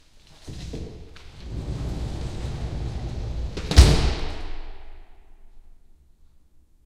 06.01.2016, in a very small hall
Recorded with a crude DIY binaural microphone and a Zoom H-5.
Cut and transcoded with ocenaudio.